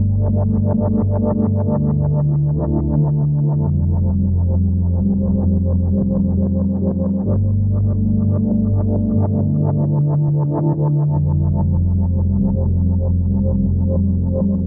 Some thick notes from a Nord Modular patch I made through some echos and gates and whatnot. It should loop OK even.